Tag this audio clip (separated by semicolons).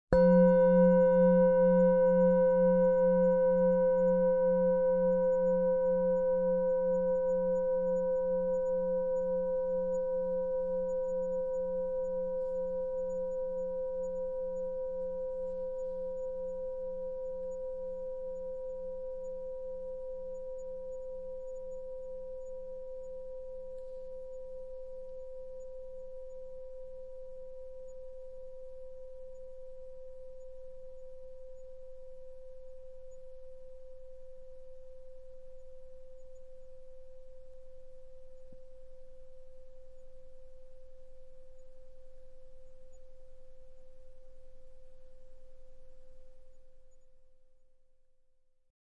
mic-90; soft-mallet; singing-bowl